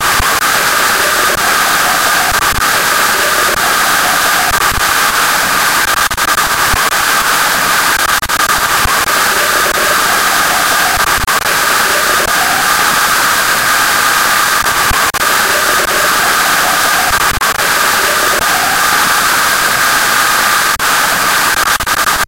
Scary Static Noise
a scary sound I made with a detuned distorted square
noise, slender, slender-man